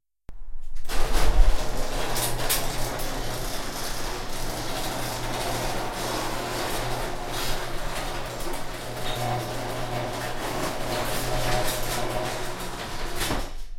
MrM GarageDoorClose
Garage Door closing, electric motor, single garage. Edited with Audacity. Recorded on shock-mounted Zoom H1 mic, record level 62, autogain OFF, Gain low. Record location, inside a car in a single garage (great sound room).
door, electric, foley, zoom